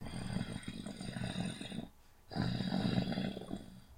The sound of a medium sized creature growling. Was made with Laptop Microphone, and recorded with Audacity.
Recorded 28/3/2013